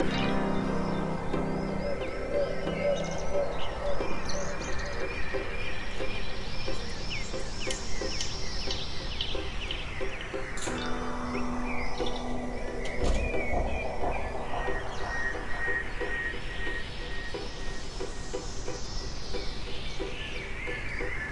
90 bouncing strings with field
I applied a nice long decay to the field sounds in time with the tempo. Loops at 90bpm over 8 bars.
dreamlike, chillout, atmosphere, chillax, strings, 90-bpm, soft, continuum4, ambient, dreamy, continuum-4, 8bars, bouncing, atmospheric, trippy, violin, 90bpm, chill